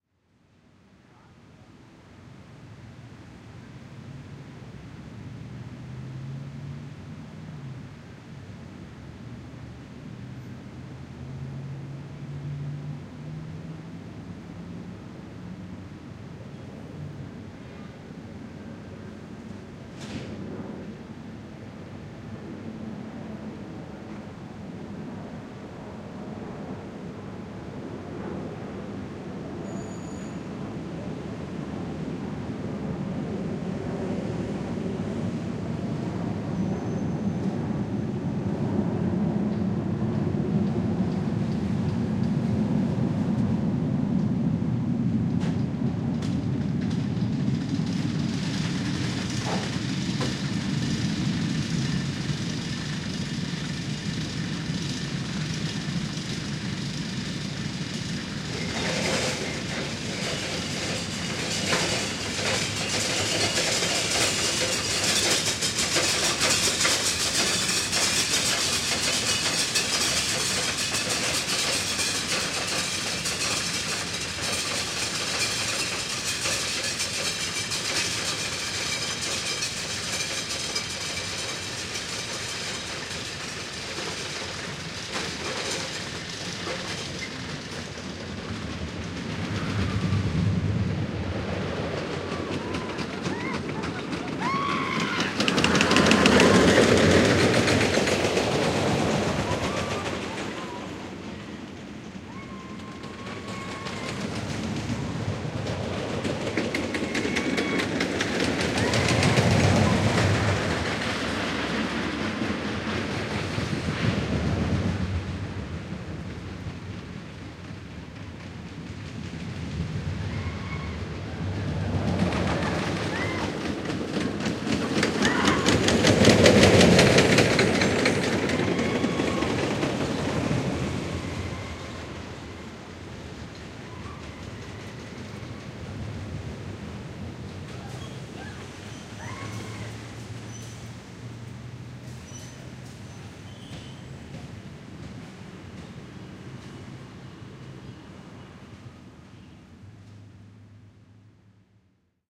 Roller coaster- nothing really noteworthy about this recording. It’s just a nice wide stereo capture of an old style wood-frame roller coaster. I think there were only 2 or 3 people riding it as I did this recording, as it was a not-at-all busy weekday afternoon in the off season. We get a passing motorcycle at the very beginning, and then a distant plane just prior to the roller coaster.
Nady stereo condenser microphone
Focusrite Saffire Pro24 interface
Logic 8 on a MacBook Pro
San Diego